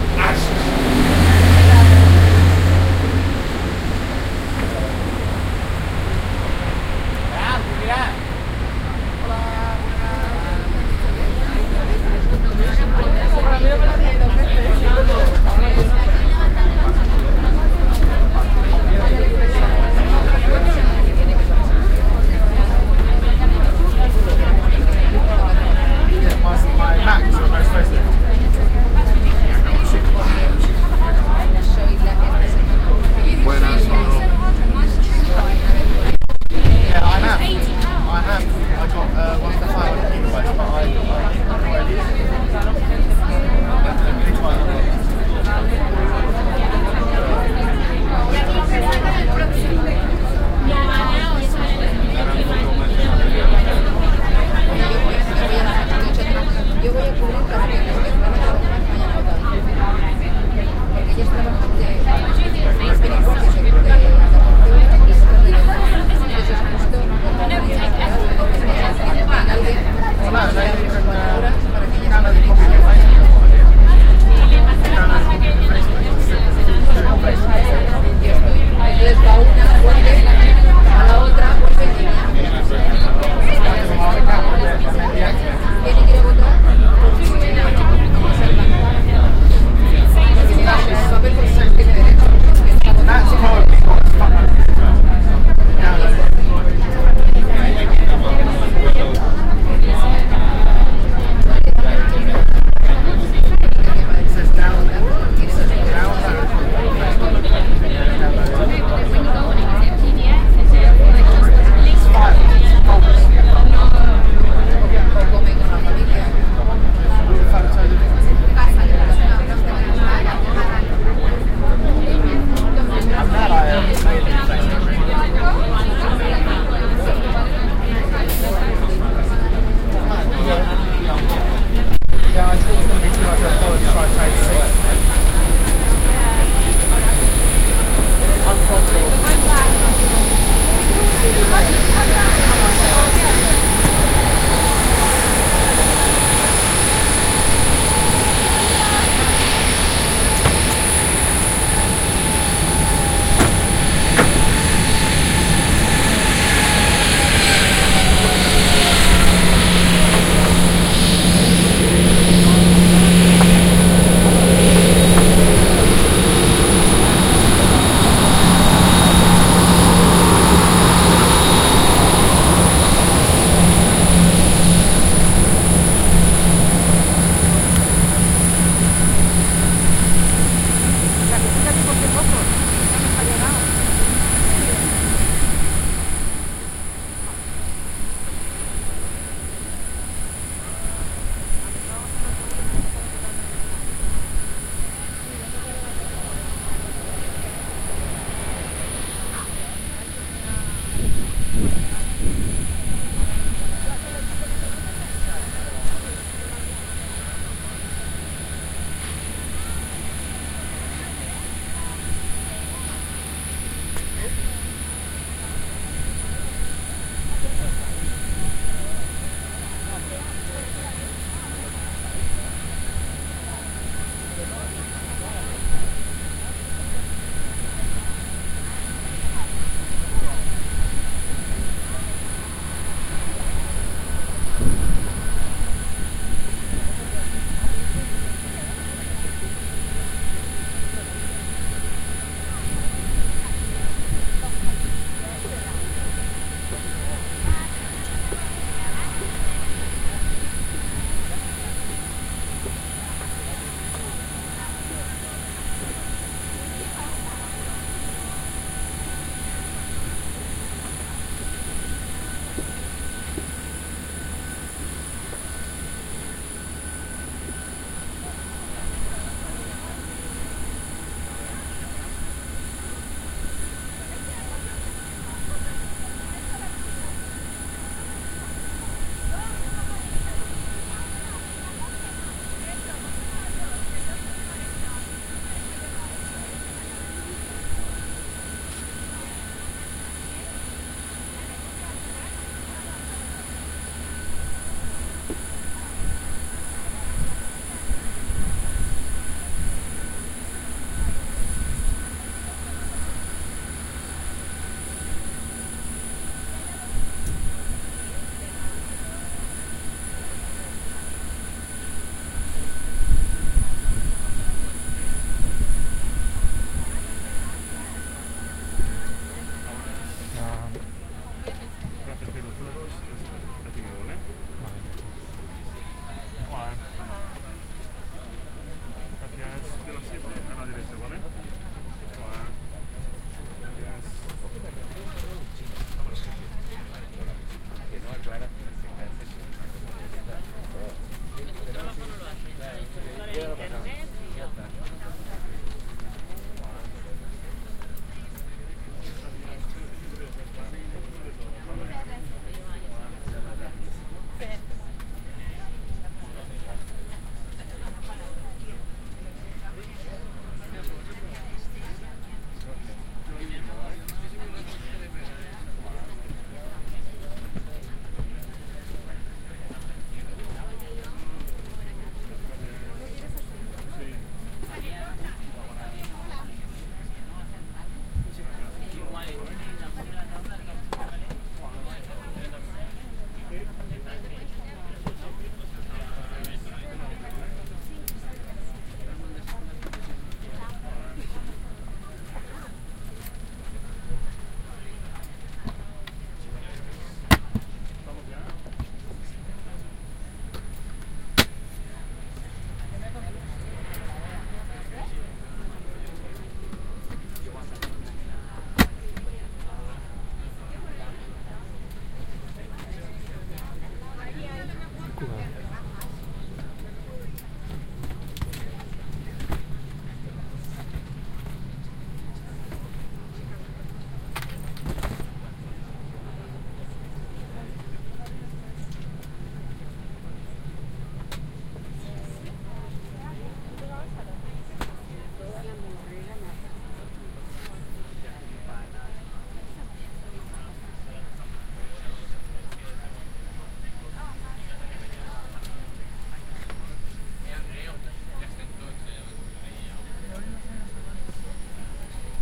Valencia Manises airport departure 2- outside the plane and getting on
On the airport runway waiting to get on the airplane. The engines of the airplane and other crafts can be heard. Then there is the entrance to the cabin and the cabin ambiance when people are seating and placing their luggage
Recorded with binaural head-worn microphones soundman okm